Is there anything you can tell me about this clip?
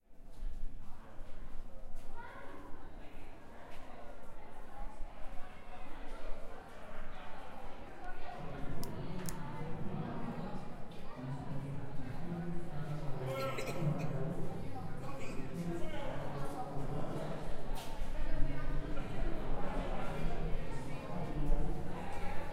Castillo SanCristobal tunnel
Voices recorded inside of Castillo SanCristobal, in San Juan, Porto Rico.
puertorico, small-echo, tunnel, voices